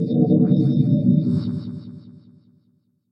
Alien Alarm
A simple but very strange noise that could be used for an alien ship alarm, or some strange machinery or whatever the heck you'd like.
strange, loud, alien, alarm